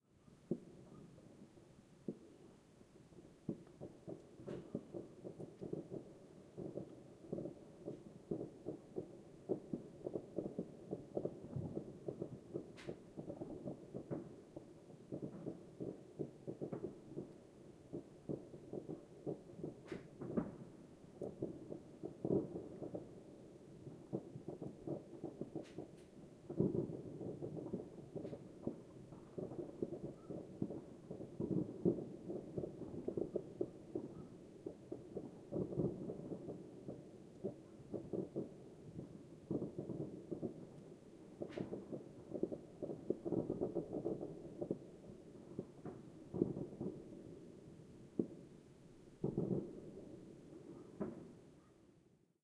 Raw audio of several distant fireworks. It is rather quiet, so you may need to boost it.
An example of how you might credit is by putting this in the description/credits:
The sound was recorded using a "H1 Zoom V2 recorder" on 1st January 2016.